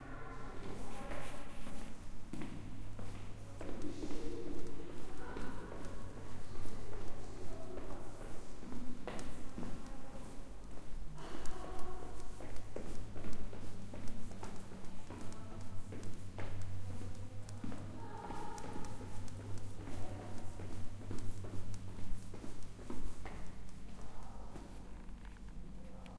fast steps downstairs in a large stairwell
Downstairs moving person in a large stairwell